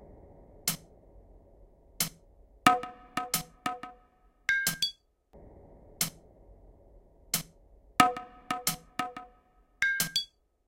Ambient Groove 014
Produced for ambient music and world beats. Perfect for a foundation beat.